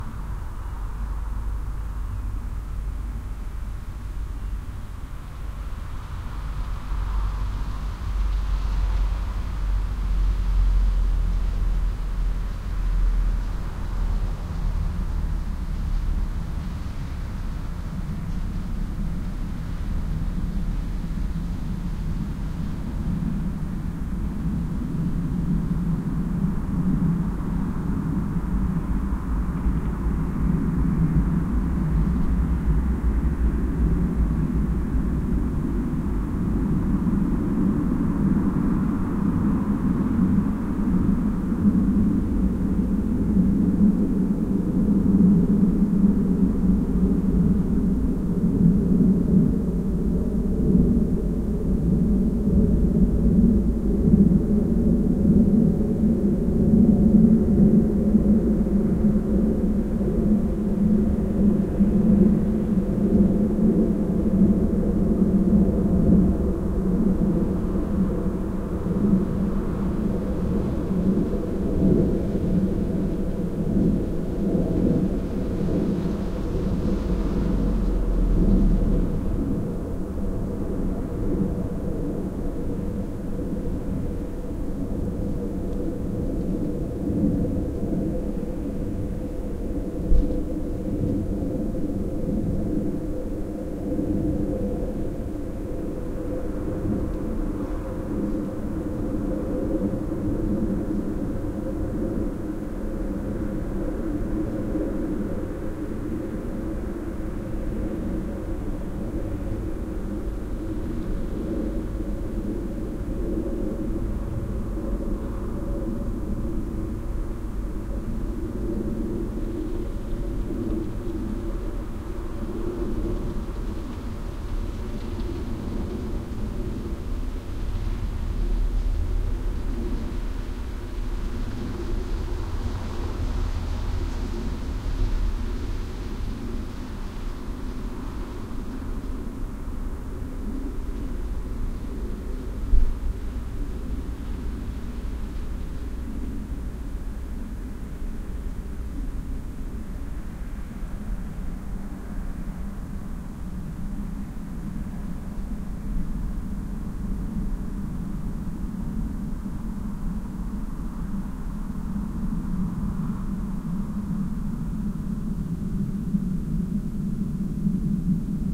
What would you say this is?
Snowy day, ambience
06.01.2016, outside
Snowy evening ambience.
Recorded with a crude DIY binaural microphone and a Zoom H-5.
Cut and transcoded with ocenaudio.
ambient, background-sound, raw, snow, winter